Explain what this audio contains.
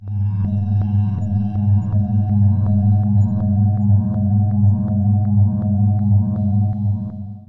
nuxvox deep
Just a simple vocal overtone sample using a mic plugged into my Nux MFX-10 Guitar effects pedal using a preset with a pitch shift, delay & reverb.